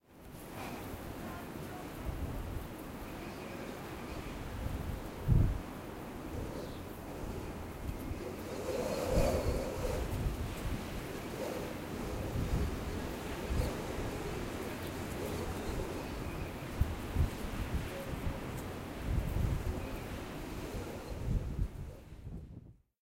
wind in a terrace with light whistles. people talking at the distance. dry leaves scratching the floor. recorded with minidisc, stereo electret mic and portable preamp.
wind, leaves, competition